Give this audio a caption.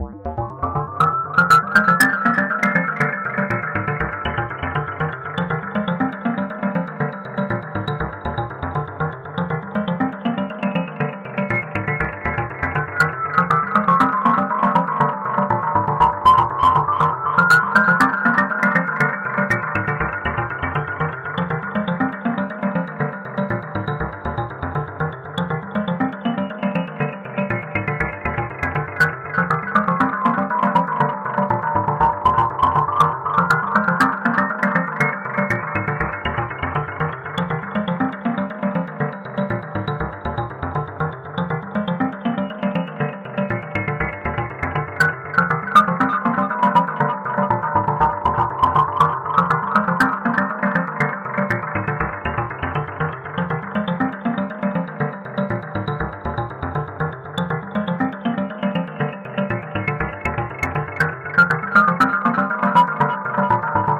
ARP A - var 4
ARPS A - I took a self created Juno (I own an Alpha-Juno 2) sound, made a little arpeggio-like sound for it, and mangled the sound through some severe effects (Camel Space, Camel Phat, Metallurgy, some effects from Quantum FX) resulting in 8 different flavours (1 till 8), all with quite some feedback in them. 8 bar loop at 4/4 120 BPM. Enjoy!
120bpm, arpeggio, feedback, juno, melodic, sequence